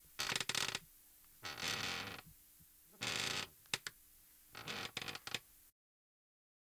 chair sqeaking
squeaking sound created by sitting on chair
chair, furniture, owi, sitting, squeaky